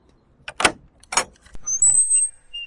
Open Gate and Who do you Hate?
A simple wooden gate that unlocks & opens. Two sounds used first sound is Gate Latch from the one and only mhtaylor67 and the second sound being Opening Kissing Door made by the genius planet earthsounds! Thanks for the sounds you two!!
open, wood, opening, latch, wooden, kissing, gate, unlock